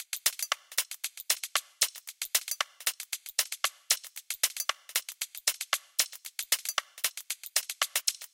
Tops Loop 115bpm
A hats/percussion loop for backing up your drums.